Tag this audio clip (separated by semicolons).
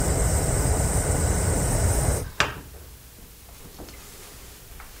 clicking; stove; fire; burner; gas